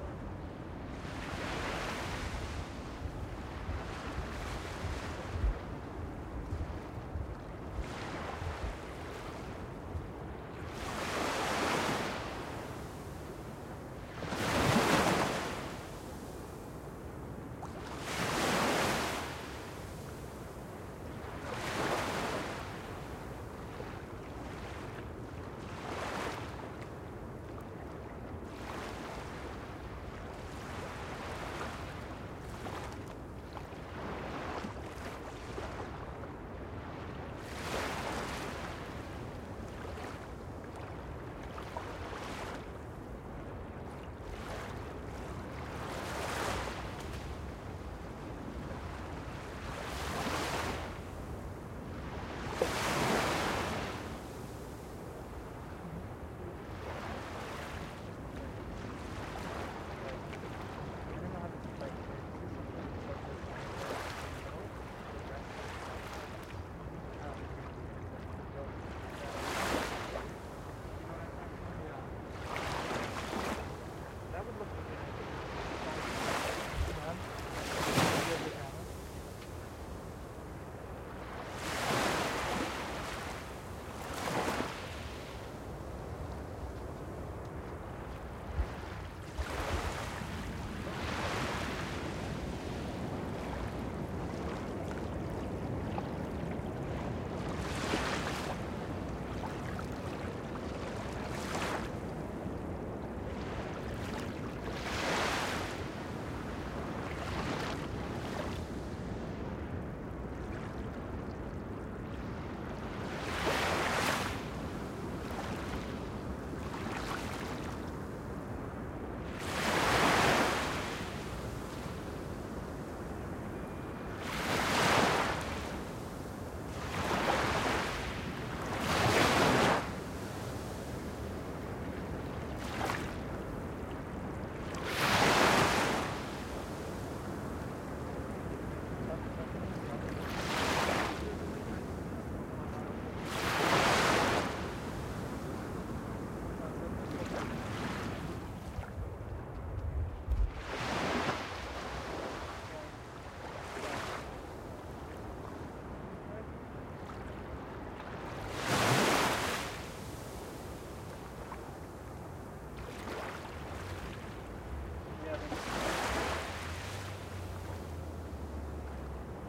Rockaway Beach Gentle Waves
Recording along the rockaway beach coast. Gentle waves rocking against the stone partitions.
beach, Gentle, islet, ocean, rocks, sand, sea, seaside, wave, waves